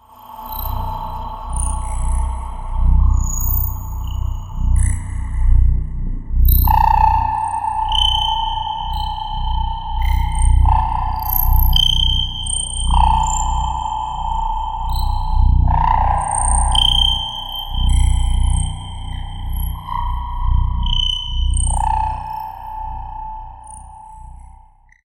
Ambience Dark Drone
an intriguing soundscape out of my synth experiments maybe useful for cinematic purpose.
Movie, Ambient, suspense, background, Atmosphere, moog, Monster, Scary, abstract, Ambiance, Alien, Creepy, Crime, synth, Cinematic, thrill, score, Drone, Film, dark